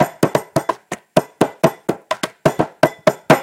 Christopher Maloine01
I modified the attack and cut the silence at the beginning and the end.
Masse:
groupe tonique
Timbre harmonique:
clair et creux
Grain:
assez rugueux
Allure:
pas de vibrato mais la cuillère vibre un peu dans le fond
Dynamique:
attaque progressive et redescente pour finir
Profil mélodique:
pas de mélodie mais un rythme irrégulier
Profil de masse:
changement des niveaux au début et à la fin
a, complexe, Itration, itX, percussions, ringing, sounds, spoon, table